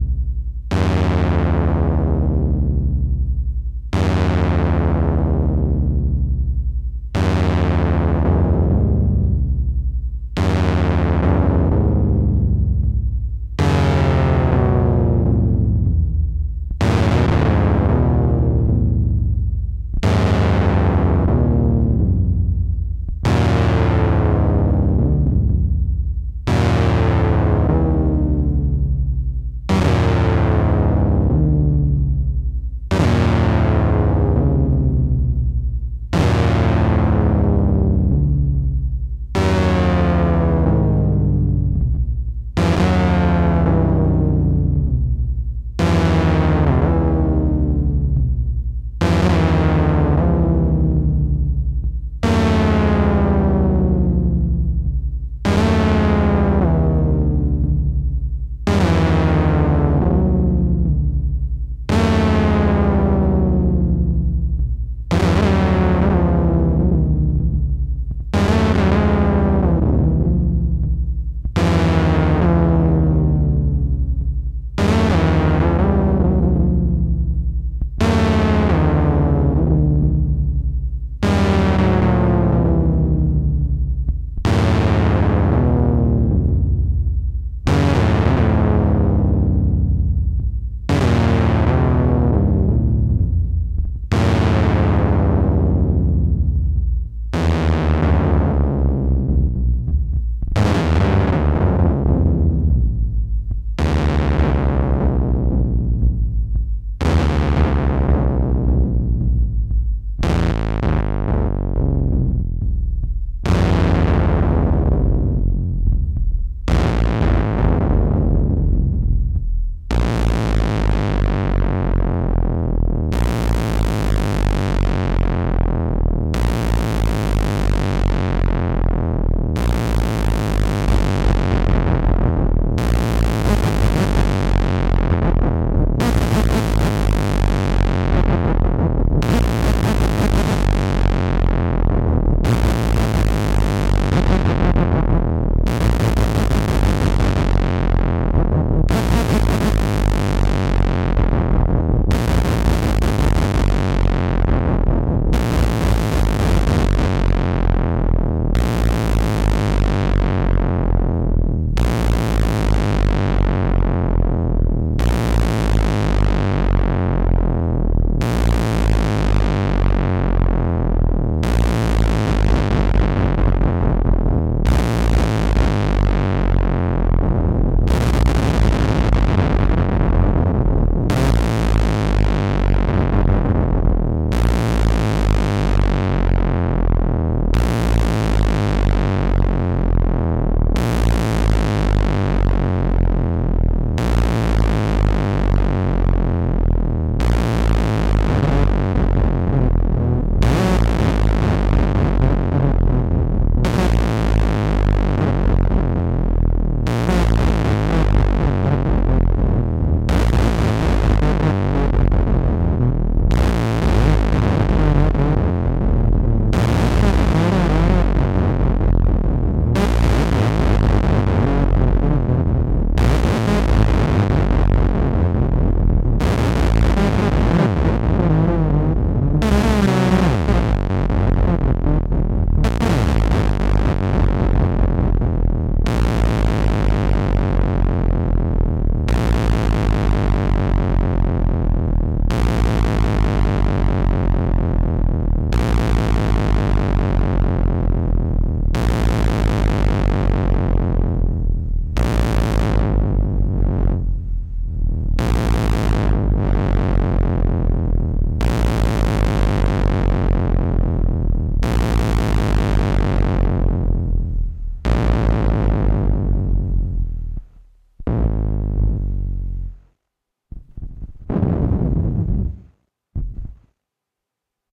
Chasing Molly os an experimental sound landscape built with no purpose but fill the void between a night without sleep and a stormed mind